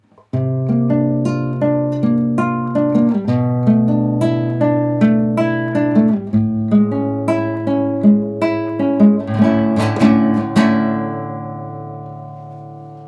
3 Chords by Classical Guitar
This is the sequence with 3 chords on classic acoustic nylon-string guitar, playing by arpeggio, gently and quilty. Minor sentimental mood. Clean signal, without reverb or another different effect.
Chords, quilty, arpeggio, nylon, clean, acoustic, classic, guitar, chord, animato, sentimental, atmosphere, gently, minor